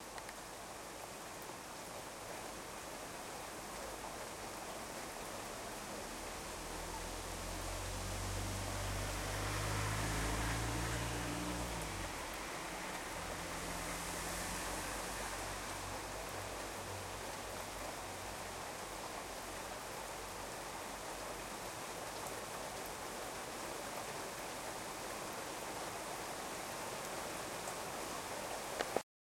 Rain-Atmo with a little bit Wind in a Tree